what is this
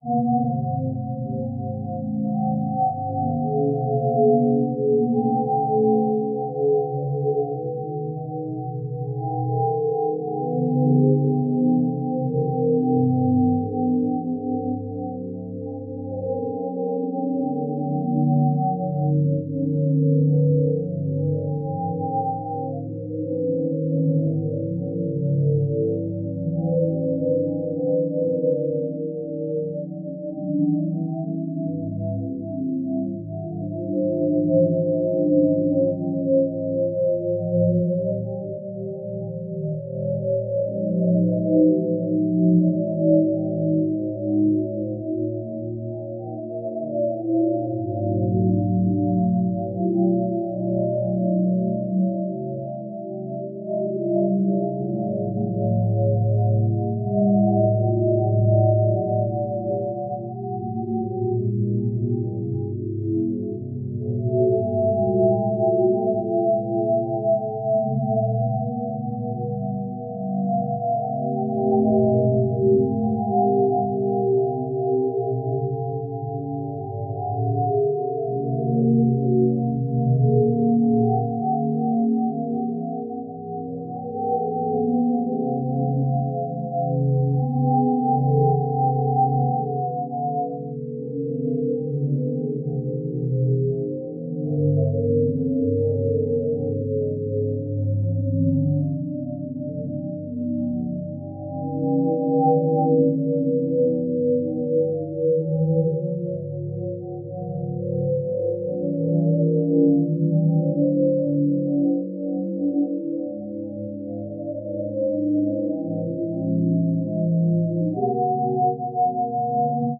Even space has ghost ships.